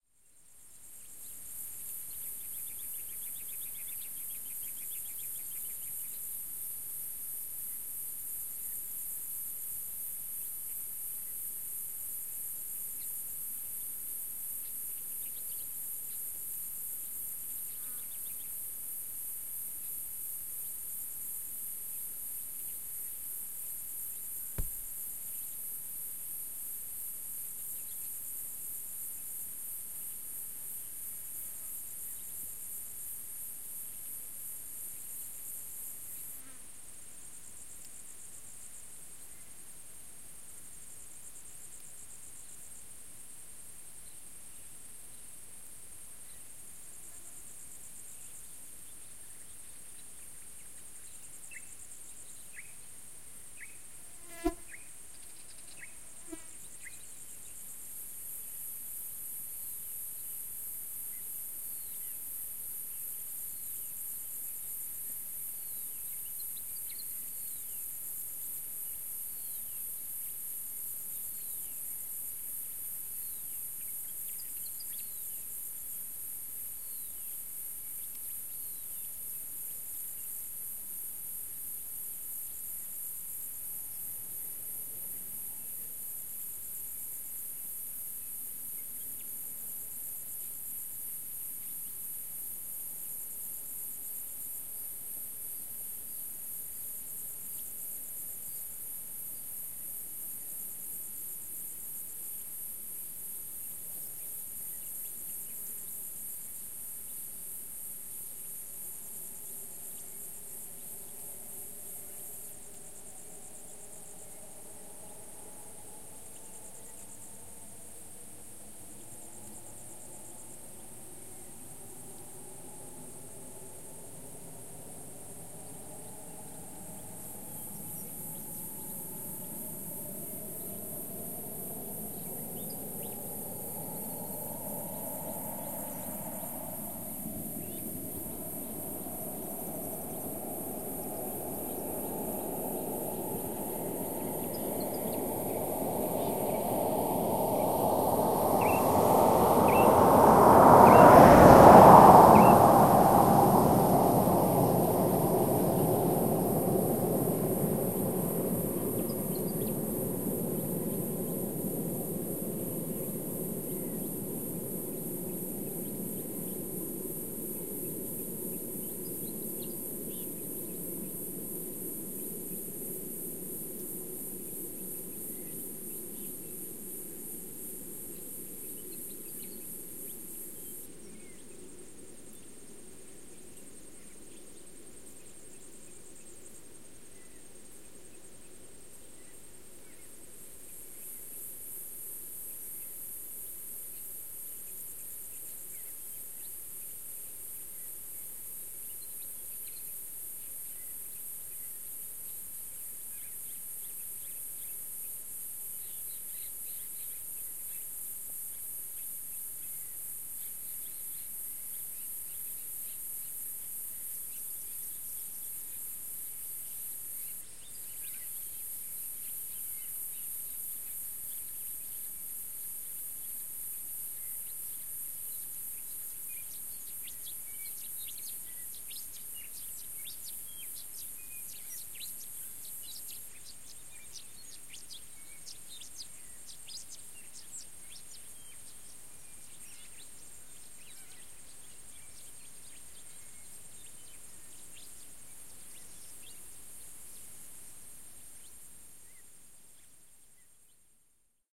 Afternoon Highway
A warm late afternoon field recording along an almost empty stretch of highway in Western Australia. Recorded with an olympus ls-100.
highway, bush, field-recording, nature, afternoon, road, Crickets